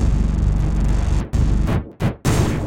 Loops and Such made from the Stickman DiSSorted Kit, taken into battery and arranged..... or. deranged?
distorted, drums, harsh, heavy, like, ni, remixes, stickman